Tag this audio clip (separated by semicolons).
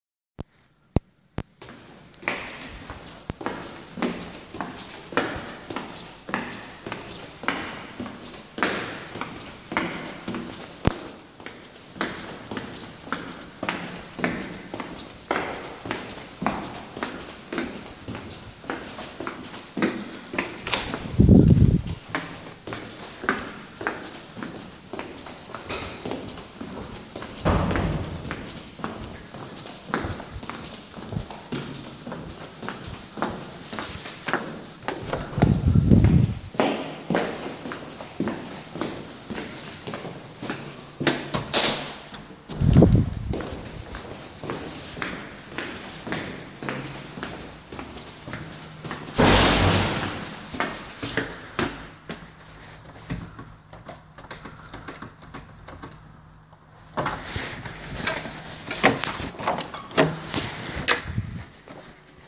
doors; Footsteps; keypad; atmosphere